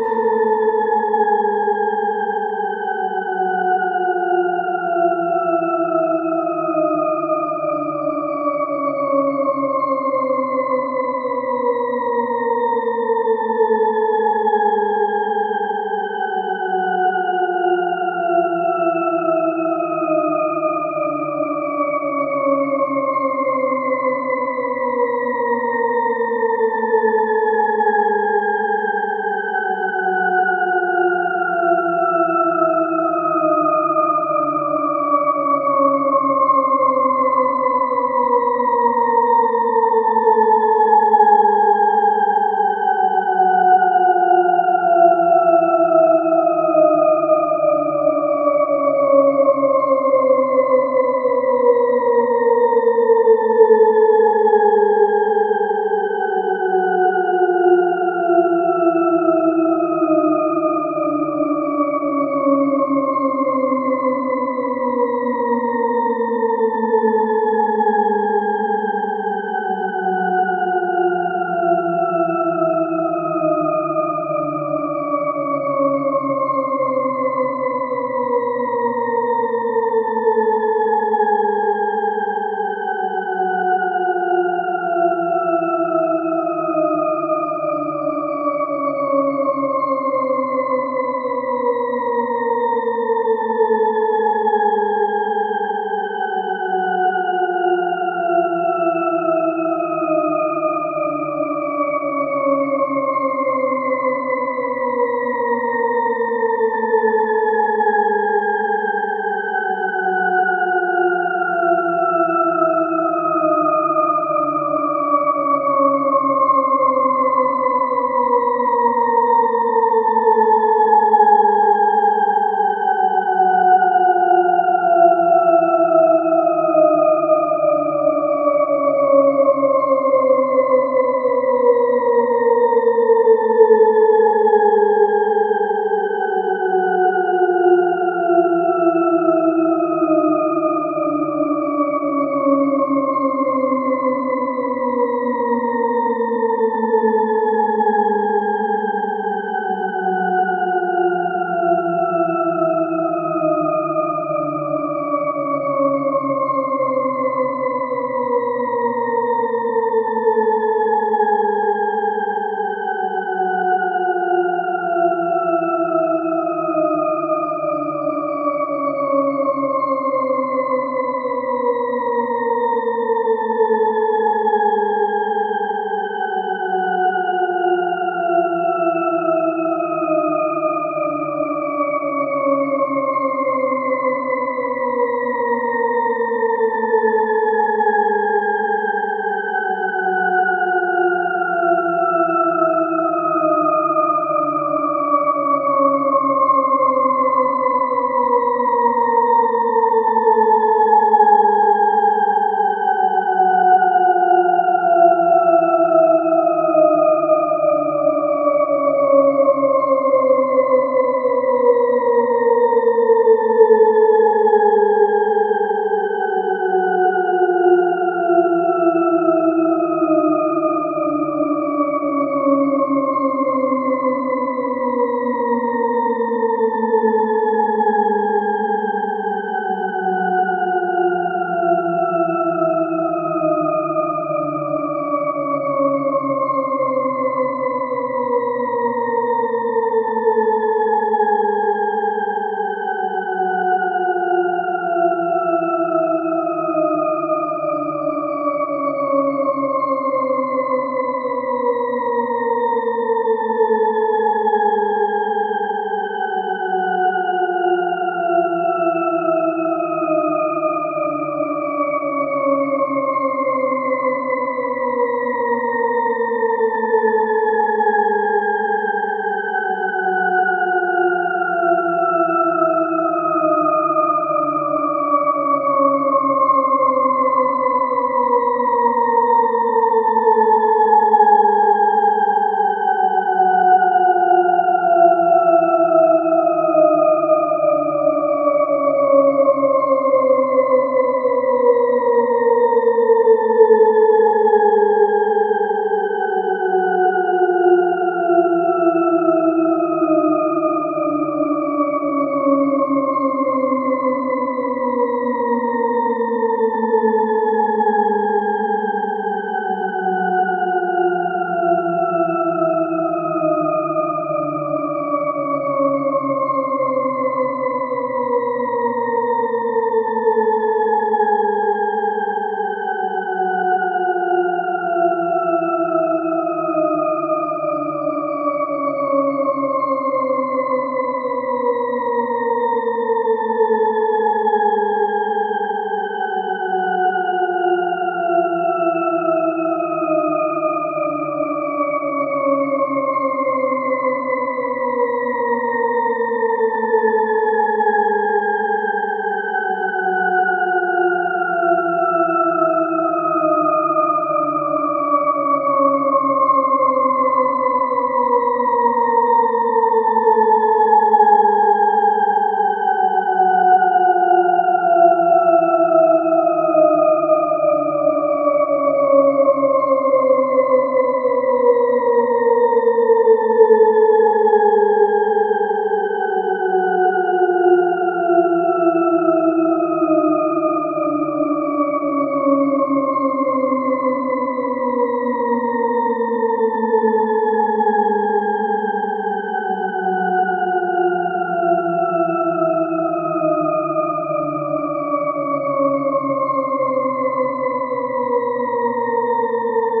shepard tone seamless
Created using audacity. A tone that sounds like it is endlessly getting lower. Completely seamless and loopable for any purpose you may have.
creepy, dark, loop, seamless, synthesis, tone